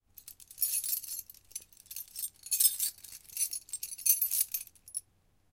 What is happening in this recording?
keys jingling
bunch of 14 old keys being drawn and jingling, recorded on Zoom H2
metal, clank, jingling, clink, keys, clatter